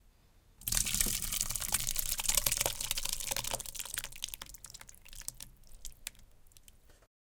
Pouring Soup in a Metal Pan - Long,Slow,Nasty
This sound was recorded for use in a video game mod. It was used as part of vomiting sound effect. Pretty gross. I heated up a bunch of vegetable soup to get it nice and slushy, and poured it back and forth between metal pans. This was a fun one to record, though my back porch got pretty messy. I did this with a couple of Kam i2's into a Zoom H4N (though I think it sounds better in with just one side in mono).
Hear the sound in-game here:
splatter, Gross, horror-effects, fx, slush, splash, slow, nasty, dirty, gore, Vomit, liquid, long, splat, Vegetable-soup, guts, Soup, blood